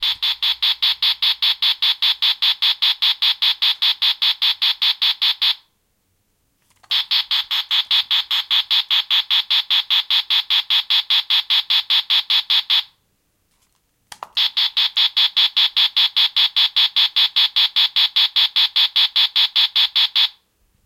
Animal Cricket Toy
beast, creature, critter, growl, horror, monster, roar, vocalization, zombie